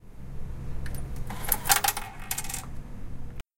Return Coffe Machine
Sound of return coins from a coffe machine. This sounds represents the fall down of the money to the plastic deposit to take the change.